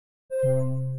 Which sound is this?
Sounds from a small flash game that I made sounds for.
Alien, game, space